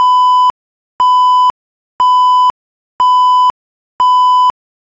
Annoying beeping like an alarm clock.